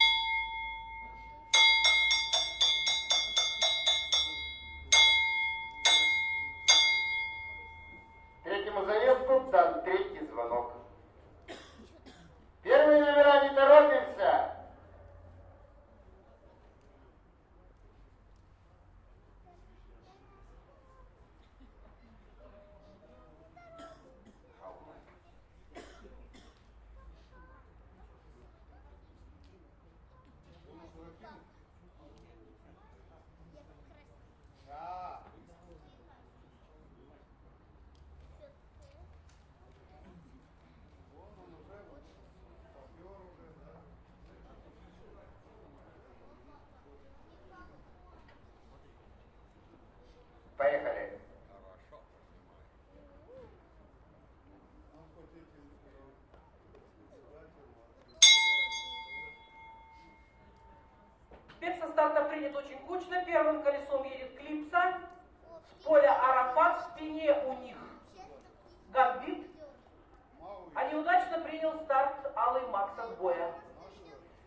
hippodrome.thrid bell to race #3 and start
Start race #3.
Recorded 2012-09-29 12:30 pm.